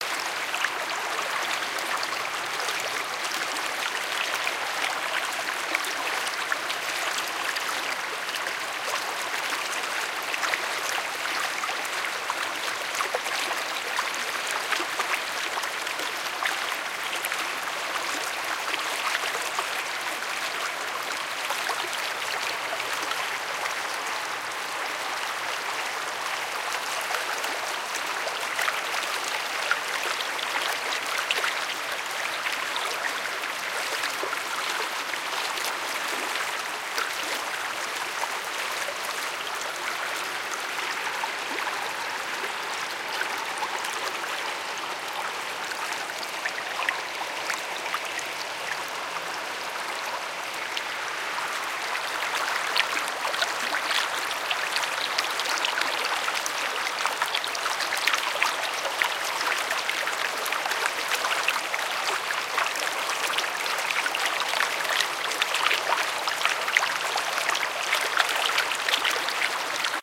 This is a small river near where I live, When I recorded this I was under a noisy bridge so I decided to reduce the noise a little and I came up with a quiet gentle stream of water. Enjoy!
bubbling, flowing, river, stream, water